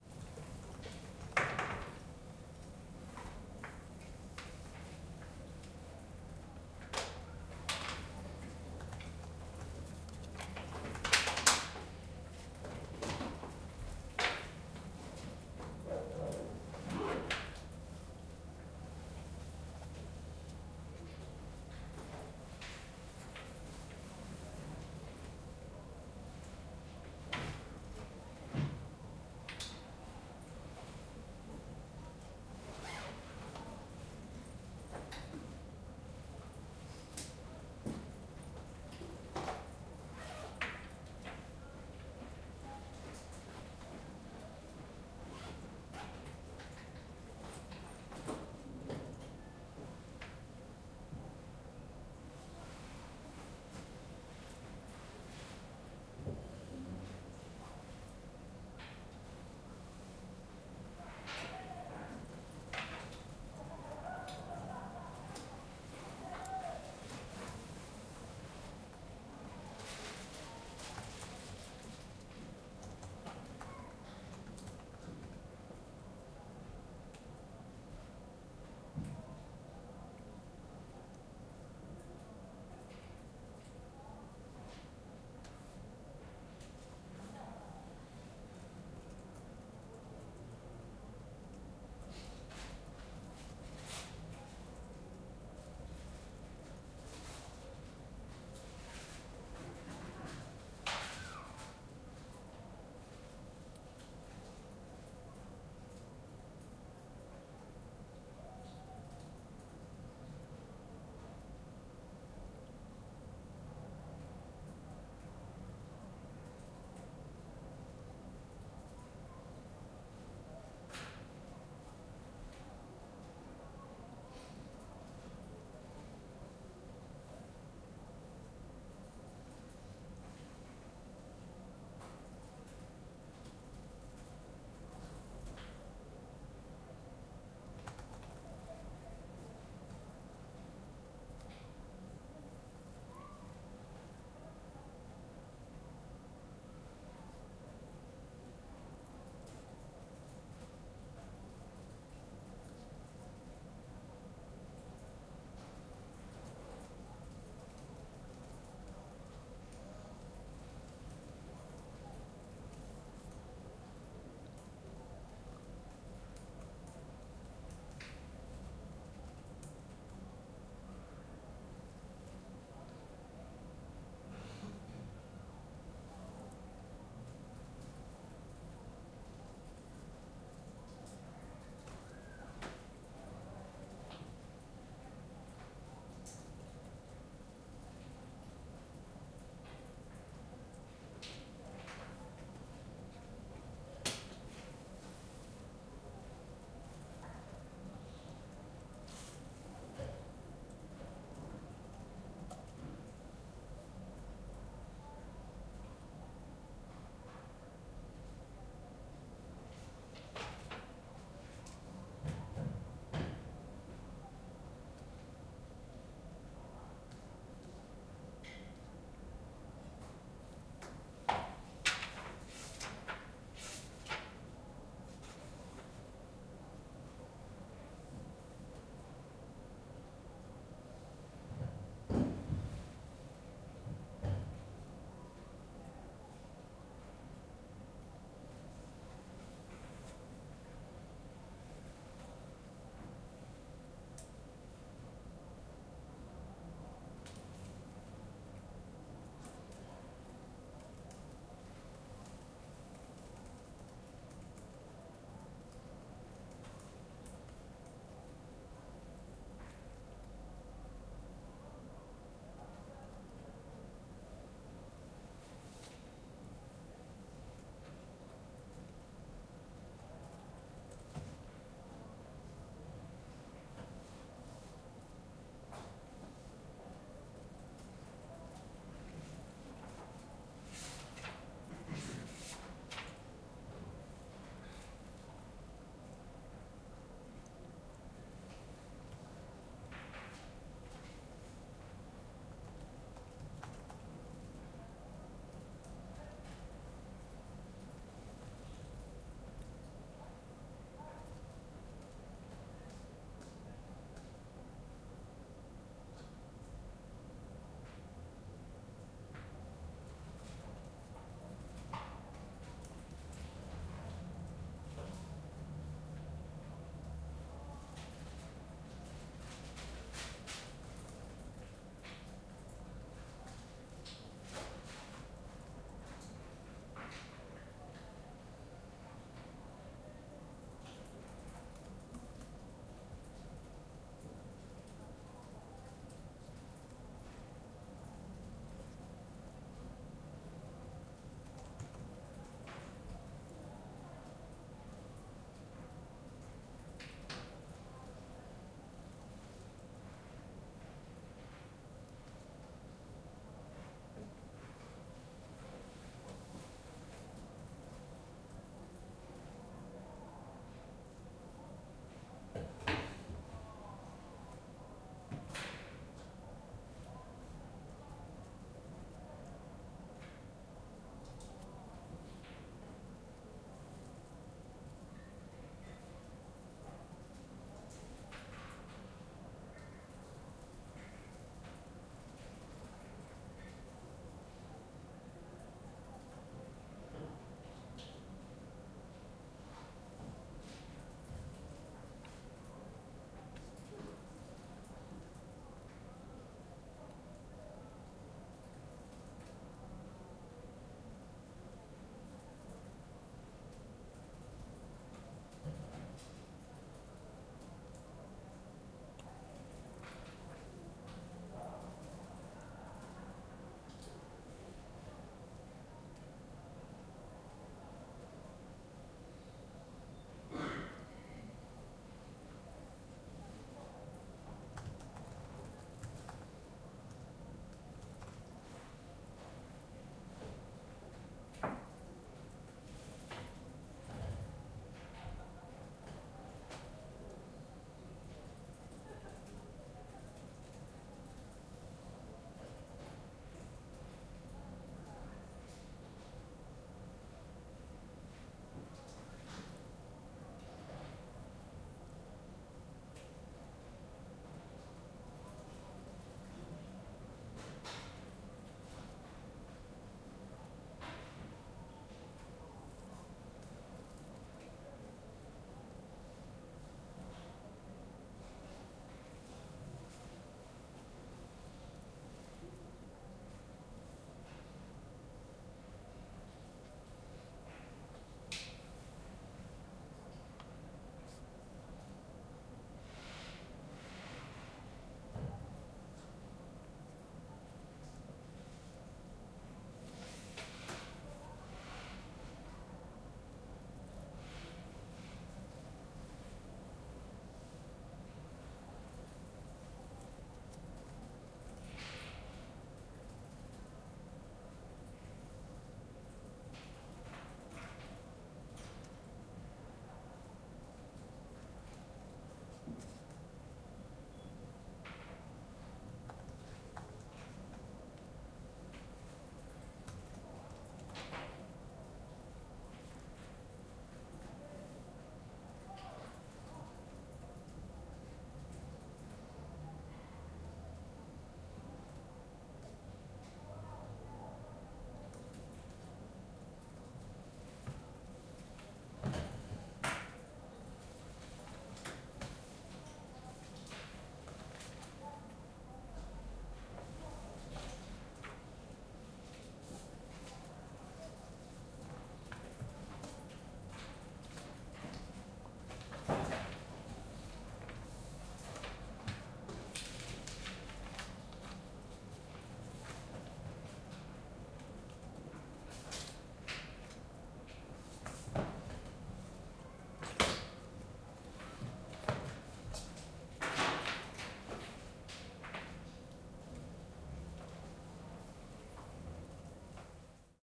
Quiet library ambience
Quiet university library, with only 10-12 people reading in silence. No voices, noise only from pen, page, book, chair and ventilation. Sometimes you can hear people outside talking and laughing.
Alterntive of my ambiance more noisy:
university, pen, ambient, soundscape, ambience, campus, background, library, page, book, field-recording, quiet